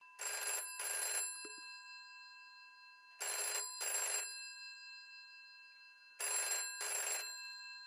telephone ringing inside an office room